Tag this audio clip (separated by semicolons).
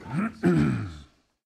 clearing; human; throat; vocal